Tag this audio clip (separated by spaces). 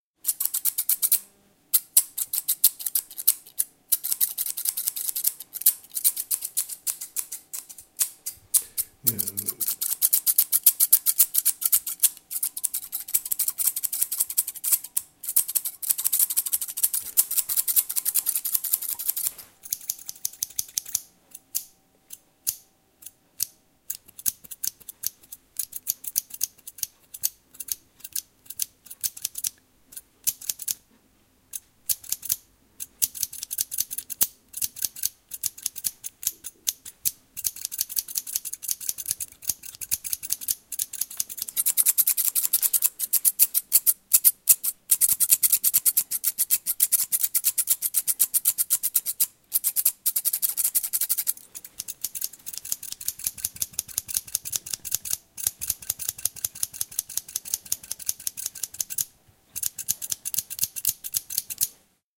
barber hairdresser sharpening shearing